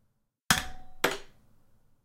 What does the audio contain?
#5 Metal Tap
Tap, impact, Hit, Metal